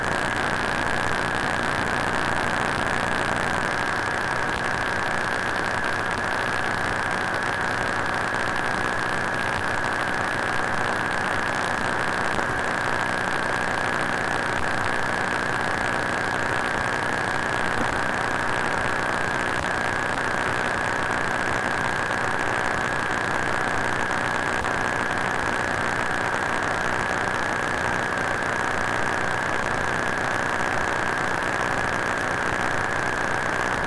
Normalized noisy PC output